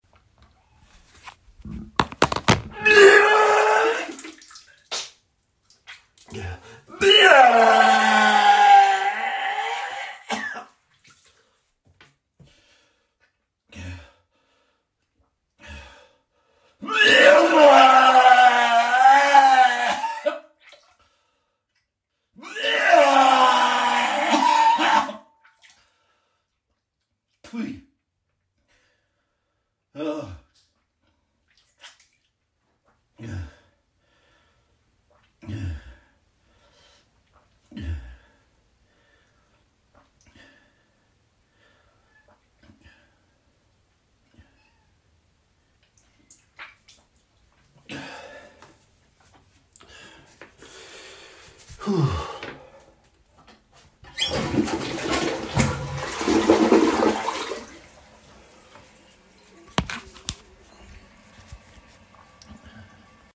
Content warning
I’m always chuckling at characters who vomit in shows because they usually just cough and spit up. Mine action is quite loud, violent, and rough. There’s really no way to do this stealthily.
ill, vomit, Natsot, sick, vomiting